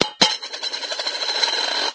Single coin dropped into a tin
Coin, no-edit, Tin